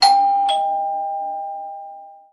I've edited my doorbell recording in Audacity to (sort of) tune it to an octave from C to B, complete with sharp notes.
door, door-bell, ring, ding-dong, doorbell, ping